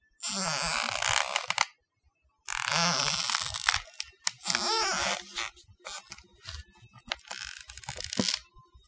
A variety of squeaks that come from me shuffling around a certain area of the hardwood floor in my apartment.Recorded with a Rode NTG-2 mic via Canon DV camera, edited in Cool Edit Pro.
floor, footstep, hardwood, hardwood-floor, squeak, squeaky, walking